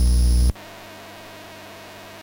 Electronic hum/buzz noises from the Mute Synth 2.
Mute-Synth-2, hum, electronic, buzz, noise, beep, Mute-Synth-II, analogue